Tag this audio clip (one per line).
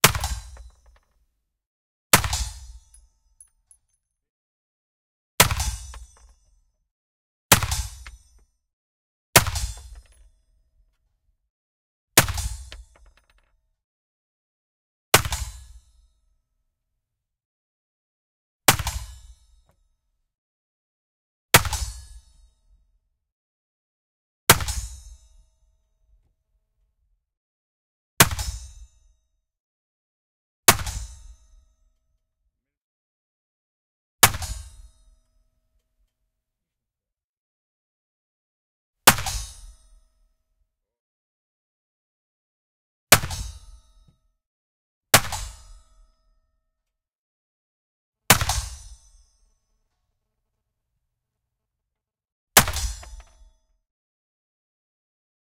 fire
gun
hit
rifle
shot
target
weapon